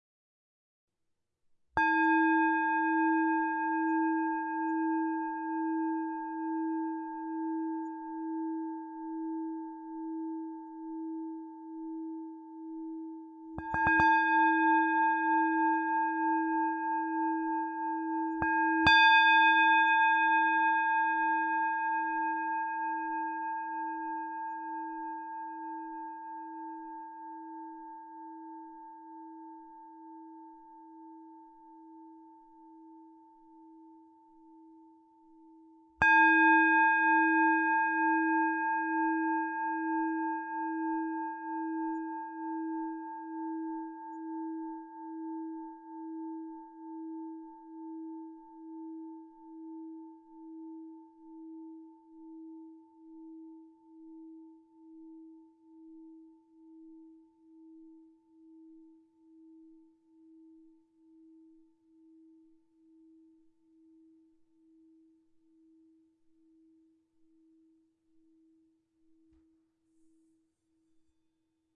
Himalayan Singing Bowl #21
Sound sample of antique singing bowl from Nepal in my collection, played and recorded by myself. Processing done in Audacity; mic is Zoom H4N.
ding, bowl, bell, percussion, clang, tibetan, chime, brass, metallic, ting, bronze, gong, meditation, singing-bowl, drone, harmonic, metal, strike, hit, tibetan-bowl, ring